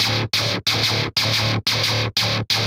90 Atomik Guitar 07
fresh grungy guitar-good for lofi hiphop
electro, atomic, grungy, free, guitar, hiphop, loop, series, sound